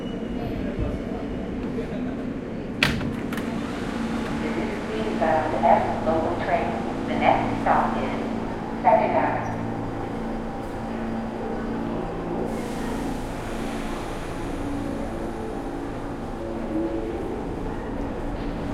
Subway Amb Exit Walking Out
Subway car exit with train announcement
field-recording, H4n, MTA, NYC, subway, Zoom